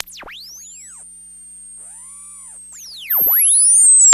progressive psytrance goa psytrance
progressive, psytrance, goa